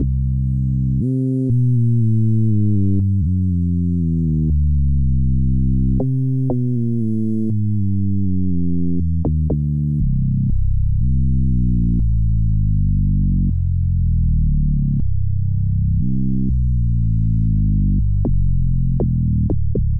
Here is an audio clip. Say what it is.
MOV.Baix 1
Mysterious bass line created with a MALSTRÖM graintable synthesizer and edited in Logic 6.